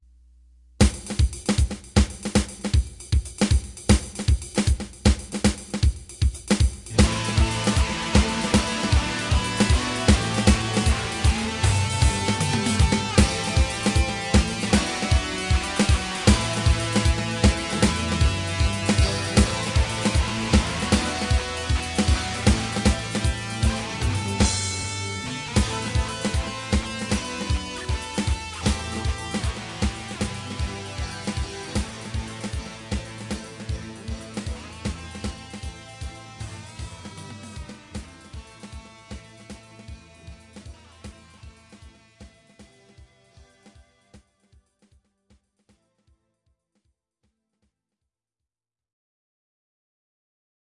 Very cool rhythm with heavy guitar playing in octaves, Played on a Fender Stratocaster through a DigiTech GSP2101 Pro Artist guitar processor. Fun Stuff!